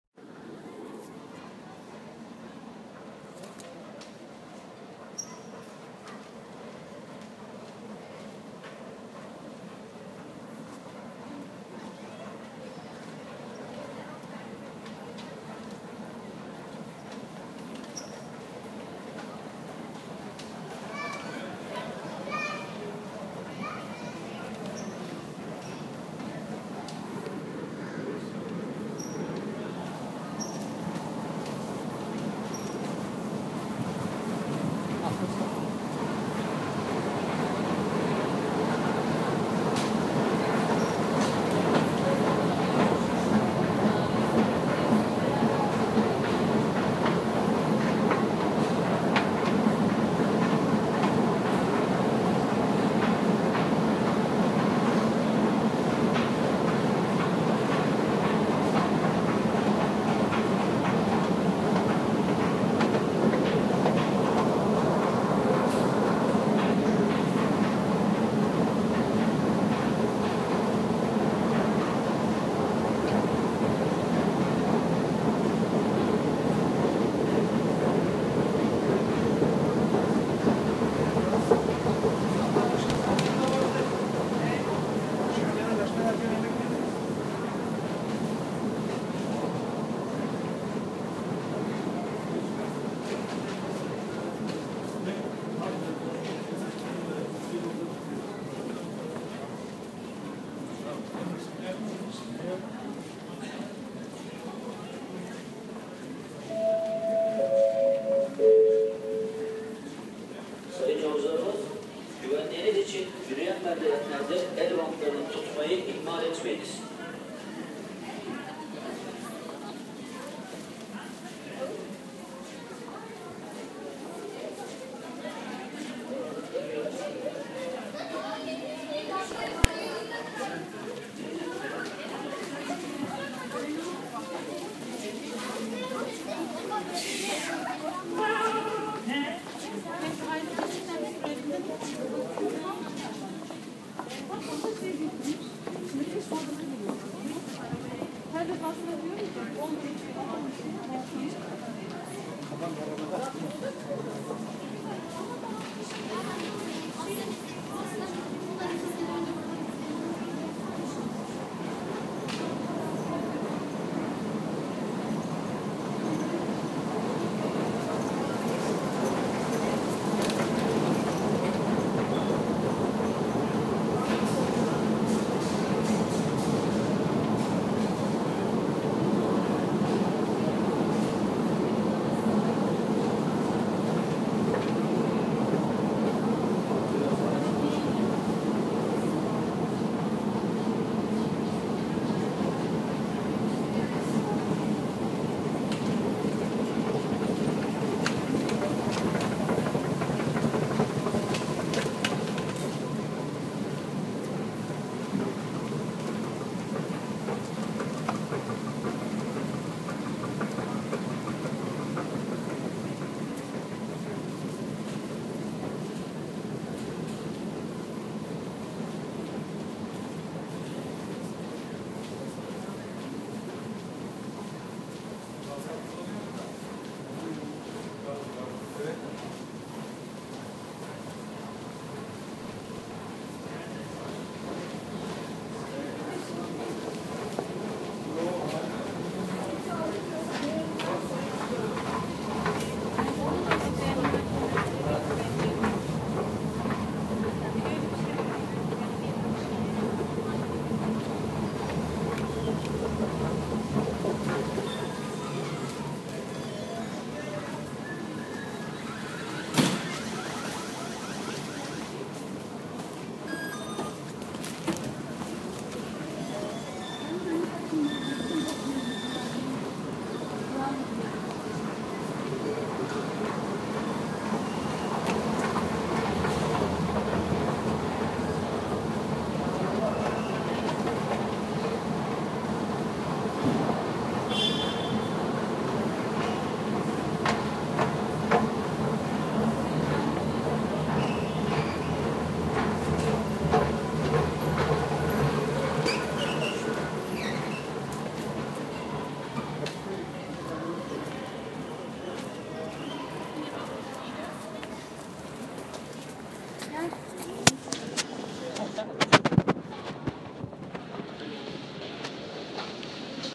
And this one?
this sound about marmaray train station in istanbul